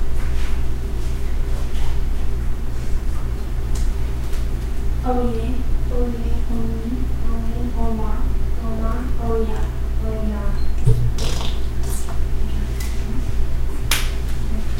Noisy snippet during a vocal track session intermission.